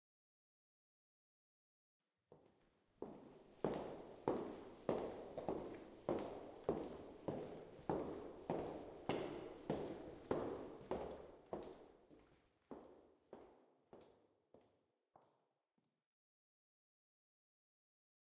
Walk - Higheels, Hallways
Walking in hallway wearing highheels
CZ, Czech, Panska